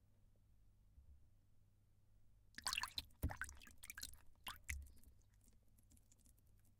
hand grub something out of the water